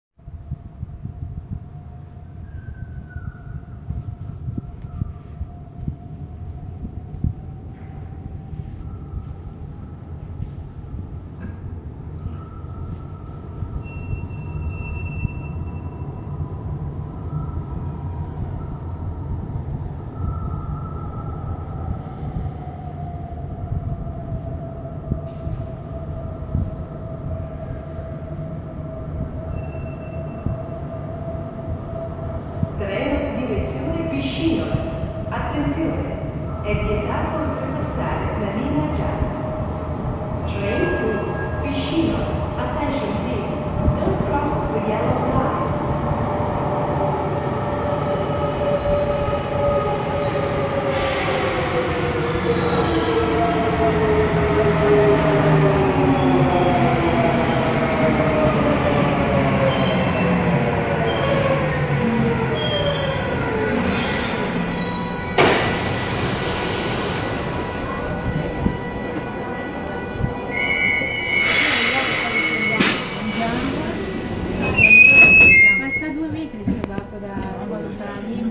Napoli - Stazione Università direzione Piscinola
Arrivo del treno alla stazione Università (Napoli). Annuncio (ITA/ENG) "Treno direzione Piscinola. Attenzione: è vietato attraversare la linea gialla".
Train arriving at Università station (Naples). Service announcement (ITA/ENG) "Train to Piscinola. Attention please: don't cross the yellow line".
Announcement, Fieldrecording, Metro, Naples, Napoli, Platform, Station, Train, Tube